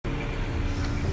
Sayulita ATM
Walking down the street in Sayulita, Mexico to go to ATM.